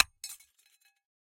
Common tumbler-style drinking glass being broken with a ball peen hammer. Close miked with Rode NT-5s in X-Y configuration.
shatter, hammer